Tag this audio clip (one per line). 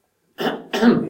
cough
disease
sick
ill
flu
health-problem
sickness
coughing
cold